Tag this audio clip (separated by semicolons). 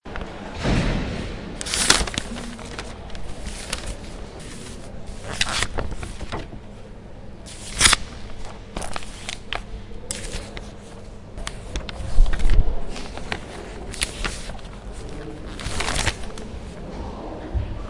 Poblenou; UPF